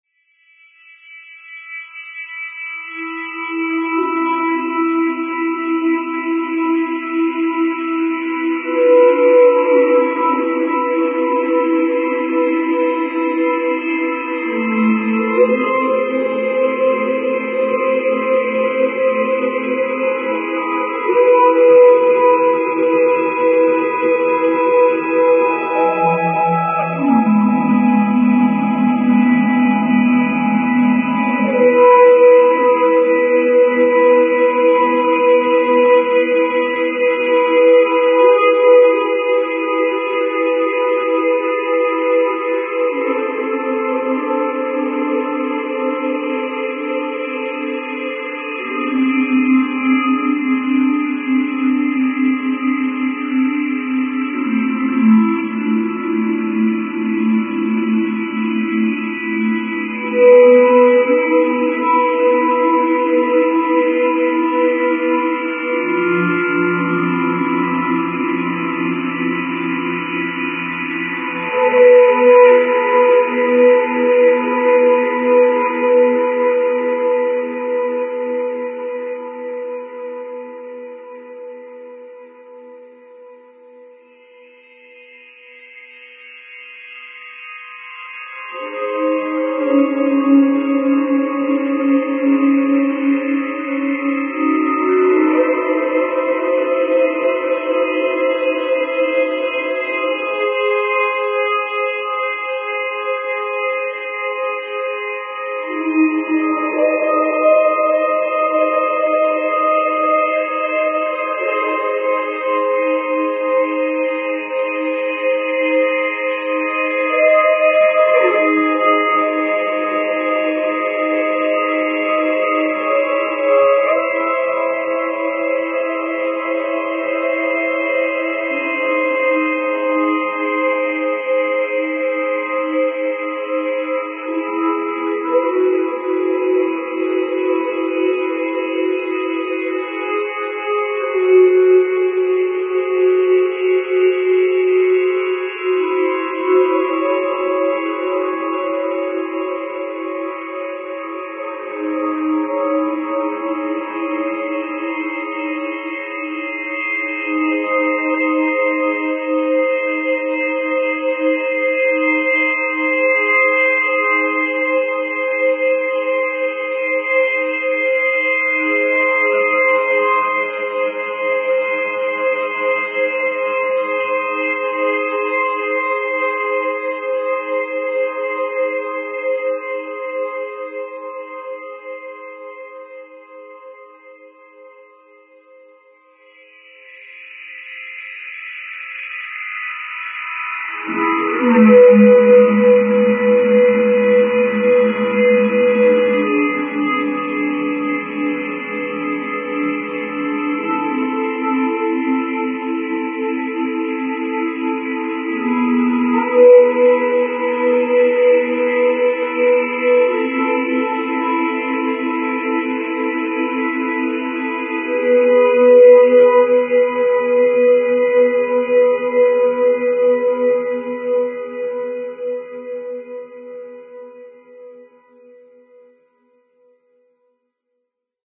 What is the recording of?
Similitude of a few singers and unidentifiable instruments performing an odd tune, with some very bright reverse reverb. This is output from an Analog Box circuit I built. This isn't likely to all that useful to anyone except perhaps as inspiration. The circuit uses a mode of a harmonic minor, typically the 2nd or the 5th. Totally synthetic sounds created in Analog Box but finalized (including the reverse reverb) in Cool Edit Pro.